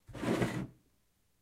drawer. open
cabinet drawer opening